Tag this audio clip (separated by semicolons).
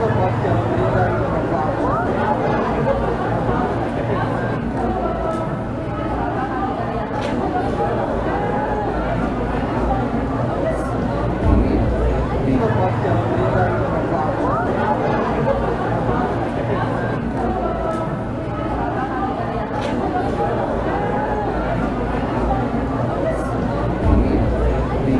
Crowd; Group